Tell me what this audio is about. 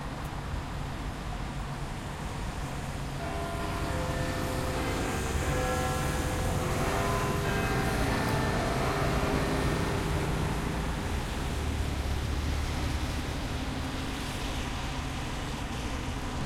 Big Ben 15.30